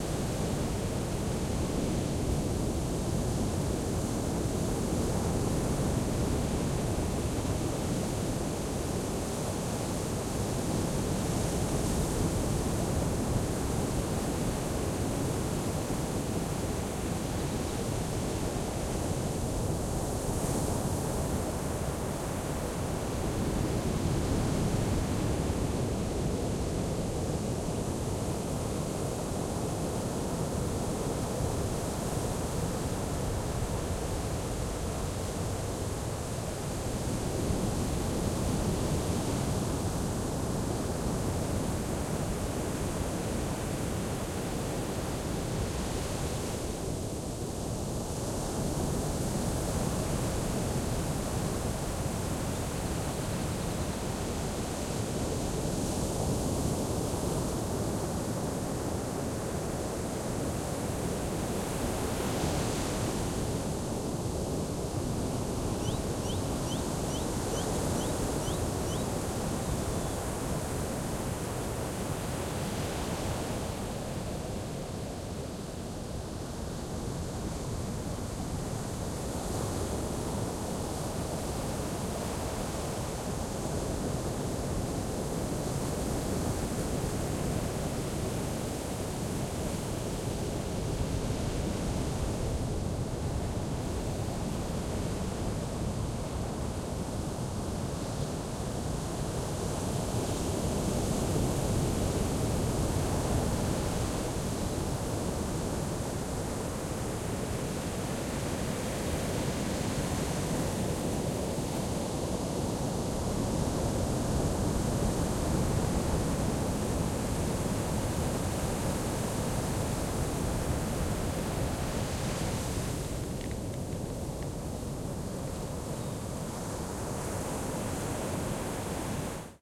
Playa Zipolite
Strong waves on the beach of Zipolite, estado de Oaxaca, Mexico. About 50m away from the sea.
2 x Primo EM272
beach, coast, field-recording, mexico, oaxaca, ocean, playa, sea, seaside, waves, zipolite